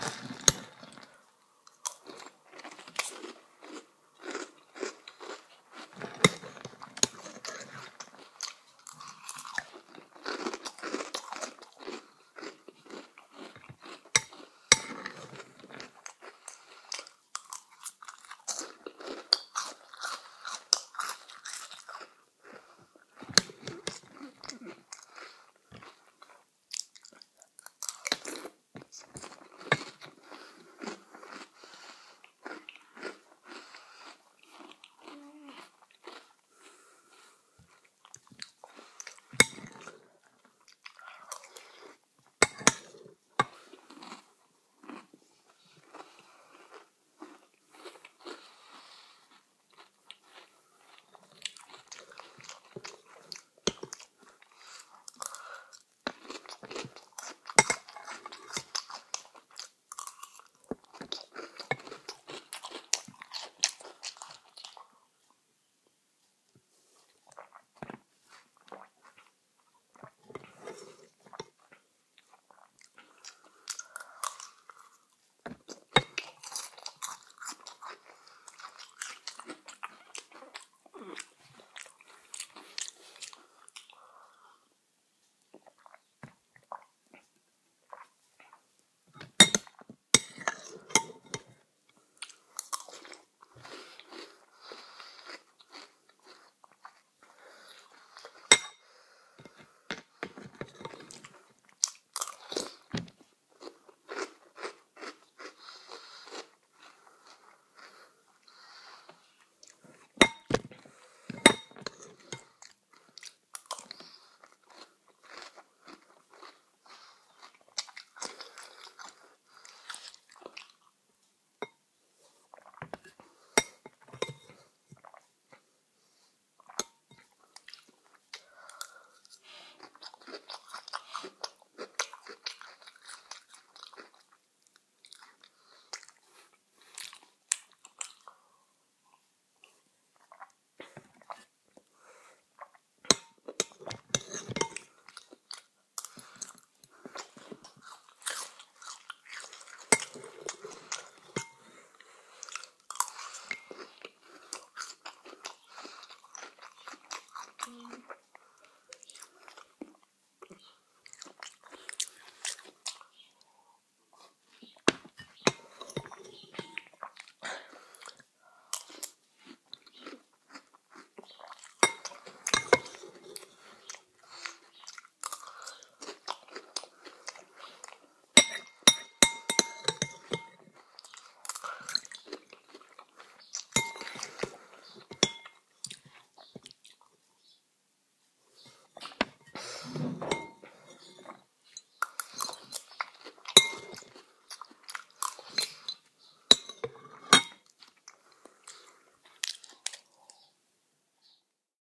Recording of eating from a small bowl of cereal- done in three and a half minutes. Recorded with a 5th-gen iPod touch. Edited with Audacity.